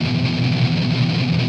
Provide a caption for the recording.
dis muted E guitar
Recording of muted strumming on power chord E. On a les paul set to bridge pickup in drop D tuneing. With intended distortion. Recorded with Edirol DA2496 with Hi-z input.
160bpm, distortion, drop-d, e, guitar, les-paul, loop, muted, power-chord, strumming